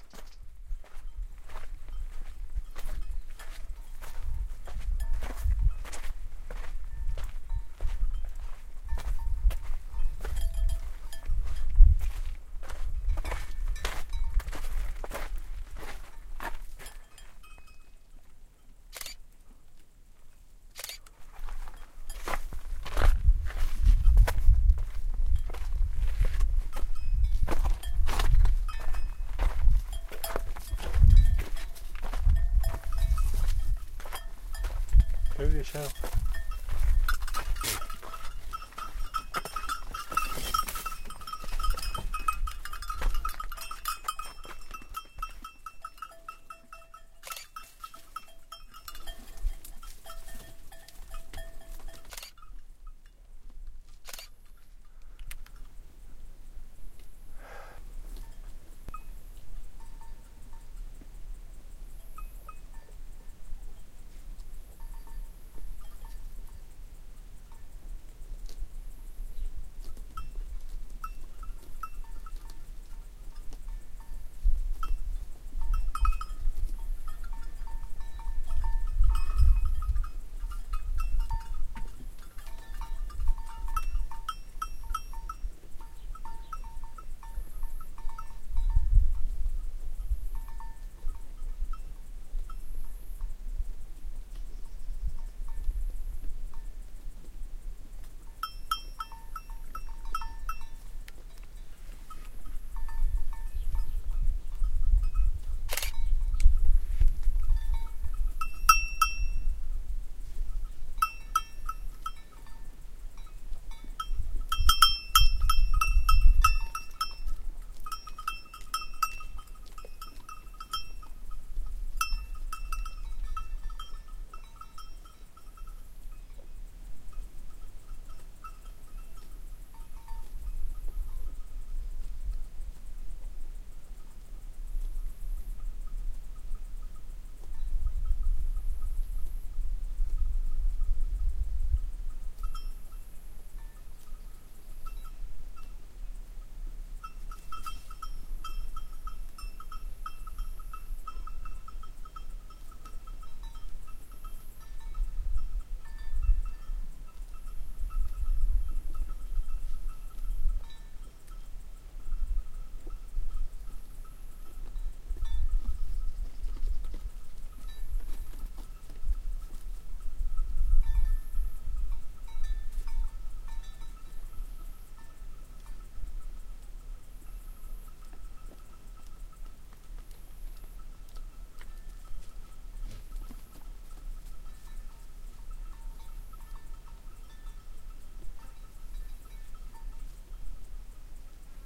grece naxos walking 3
A man is walking in a small path near Tsikalario in the greek island of Naxos. He talks to the goat and stops to take some photos. Wind can be heard.
birds, breath, circadas, greece, immersive, naxos, tsikalario, walk, walking, wind